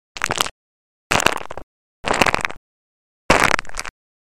crack bones
bones, crack, sfx